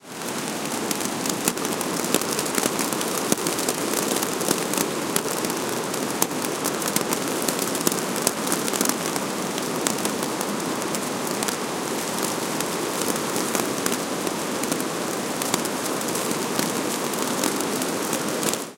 20160309 14.waterfall.n.rain
At medium distance, noise of the waterfall + noise of raindrops falling on my umbrella. Some talk can also be heard. PCM-M10 recorder, with internal mics. Recorded on the Brzilian side of Iguazú waterfalls.